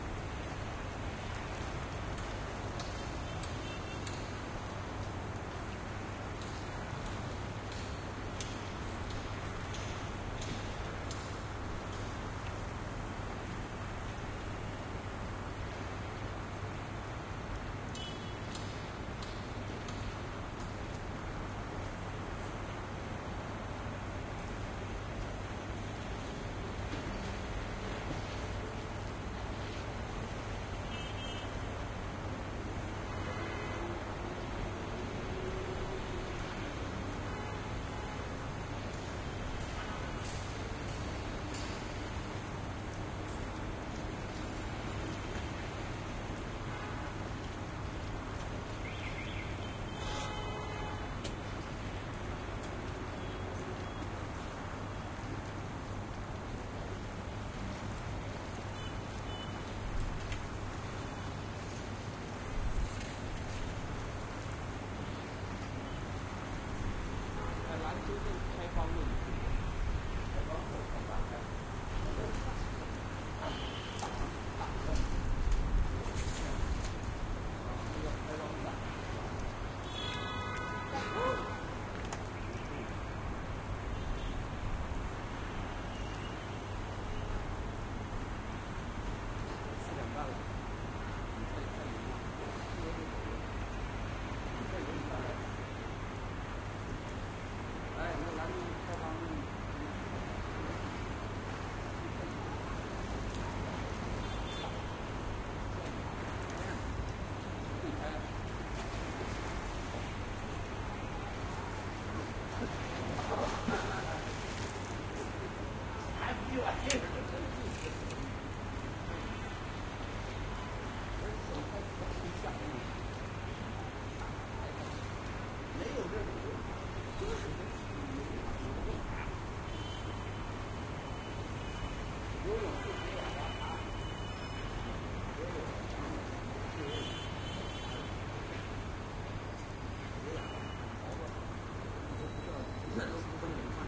Recorded on the hotel roof in Shenzhen, China. October 2016.
ZOOM H2n MS mode. Sorry for some wind.